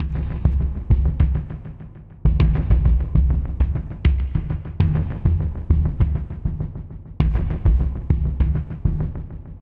5thBD L∞p-100BPM-MrJkicKZ
Fith Bass Drum L∞p 100BPM - ESCAPE
You incorporate this sample into your project ... Awesome!
If you use the loop you can change it too, or not, but mostly I'm curious and would like to hear how you used this loop.
So send me the link and I'll share it again!
Artistically. #MrJimX 🃏
- Like Being whipping up a crispy sound sample pack, coated with the delicious hot sauce and emotional rhythmic Paris inspiration!
Let me serve you this appetizer!
Here you have a taste of it!
MrJkicKZ, Loop, groove, MrJworks, Escape, 4-4, MrJimX, 100bpm, Bass-Drum, works-in-most-major-daws, Drums